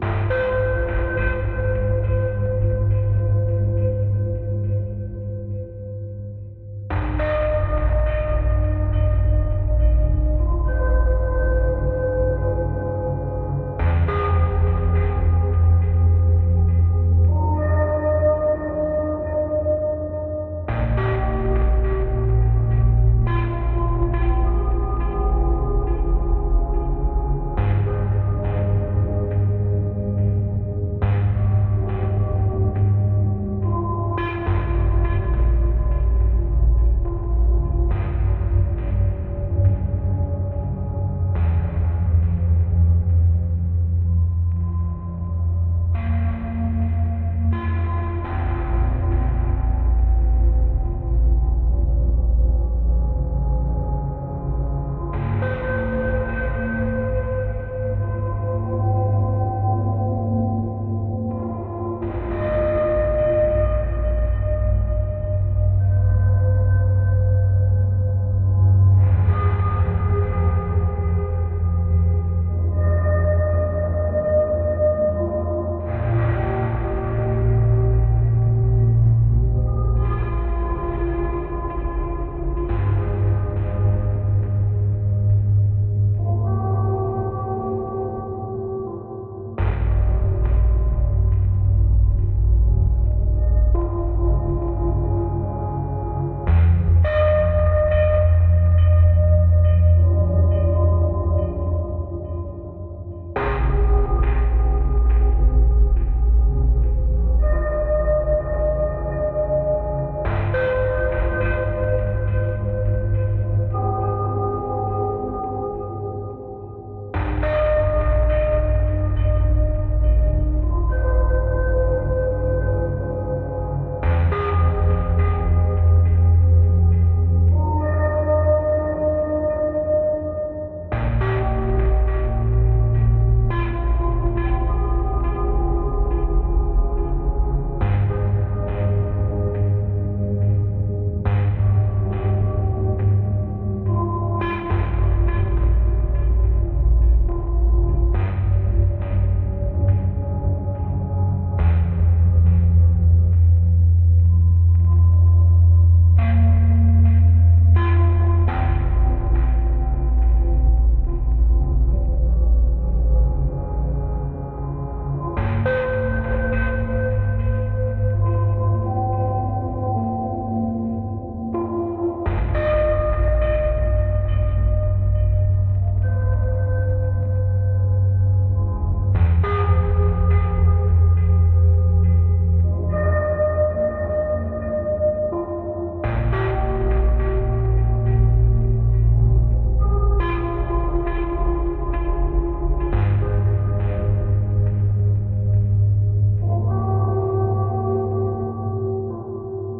Happy Halloween folks